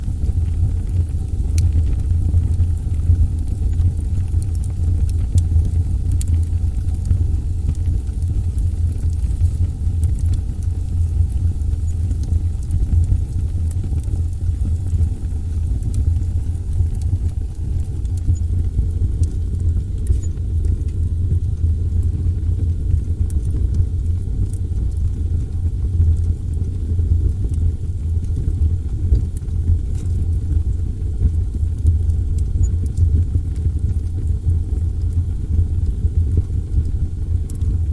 Content warning
Wood burning in the stove
burn, burning, fire, fire-burning, roaring, stove, wood-burning